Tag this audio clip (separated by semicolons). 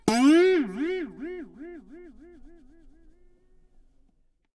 string; toy; cartoon; toy-guitar; guitar